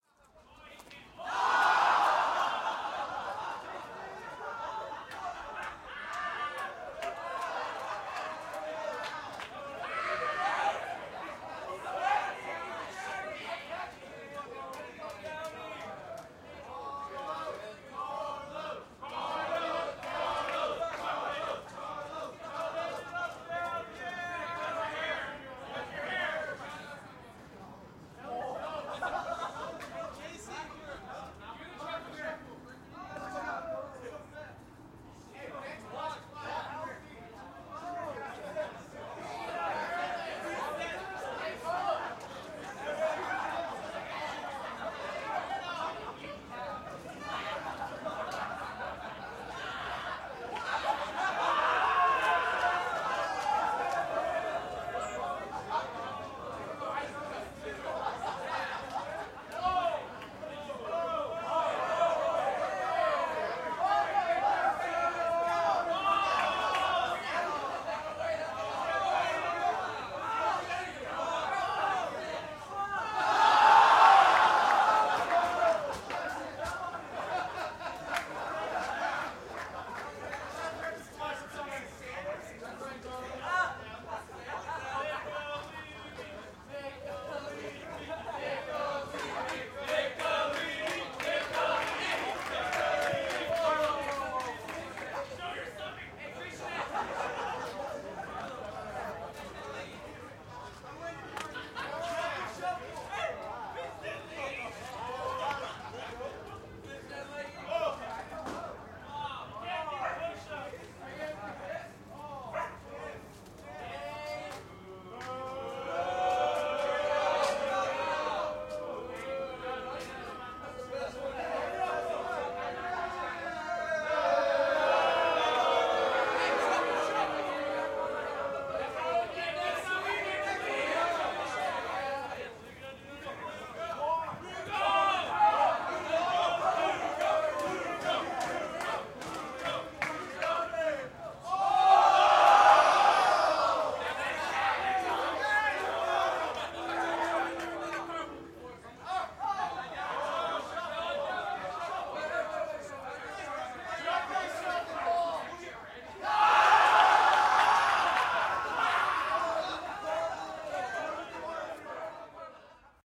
rowdy crowd
There was a party going on at a a house close to ours, so I took the opportunity to record a portion of the mayhem.
crowd, field-recording, people, rowdy-crowd, voices